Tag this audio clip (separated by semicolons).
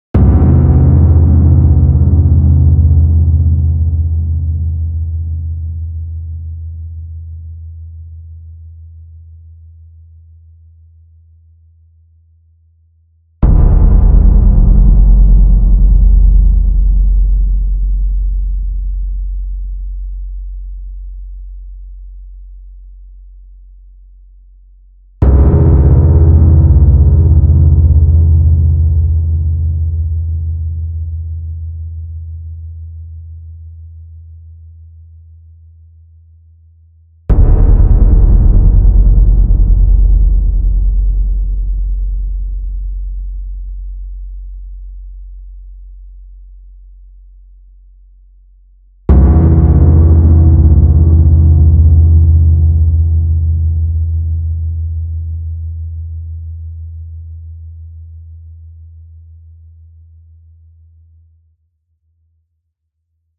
reverb; haunted; spooky; background; dong; creepy